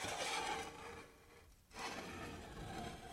Metal object slide